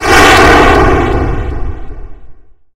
air suddenly decompressing on a spaceship